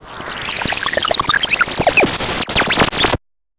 Attack Zound-176
Strange electronic interference from outer space. This sound was created using the Waldorf Attack VSTi within Cubase SX.